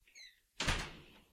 A heavy front door being closed.
closed, closing